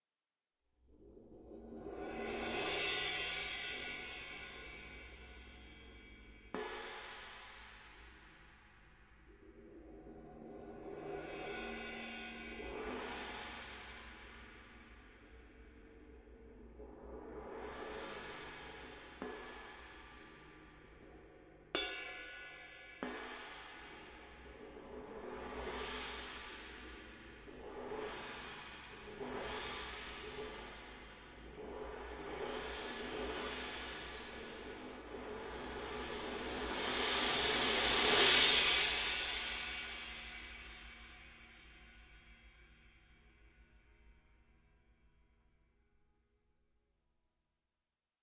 Cymbal played with padded mallets.